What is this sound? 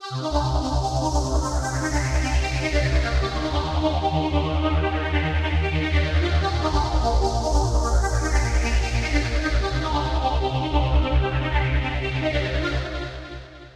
Bounce seq 5
Chopped choir vocals. slightly off beat if your planning to use drums on it place the loop a fraction of a second before the first hit of the drums. 150 bpm
150-bpm, bass, bassline, beat, distorted, progression, sequence, synth, techno, trance